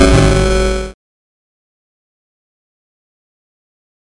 Retro Game Sounds SFX 156
gameover, sfx, weapon, sounddesign, effect, shooting, audio, sound-design, gameaudio, gamesound, soundeffect, freaky, electronic